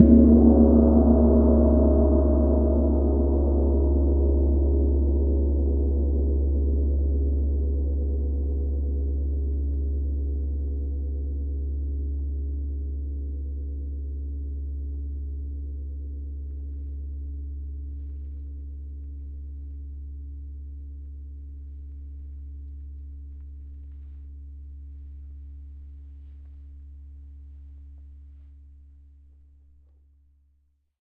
Recorded with a Sony PCM-D50.
Hitting a gong, creating a very long tail.